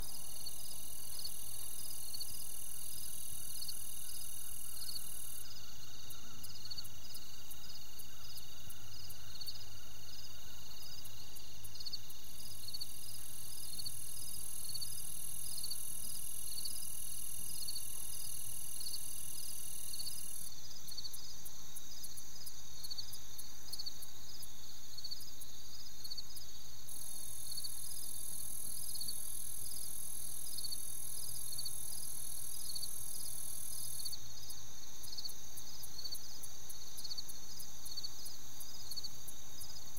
Crickets-Grasshoppers-Birds
Crickets, grasshoppers, and insects in a field of tall grass beside a mountain road in North Carolina; Some flies buzzing; flock of birds around 3 seconds.
Recorded with Zoom H4n built-in stereo mics.
insect, crickets, flies, outdoors, grasshoppers, nature, ambiance